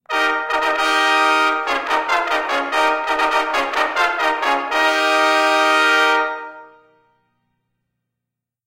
Trumpet Fanfare
A fanfare for a royal entrance in medieval times.
Created using this sound:
medieval, trumpet, announce, arrival, brass, fanfare, royal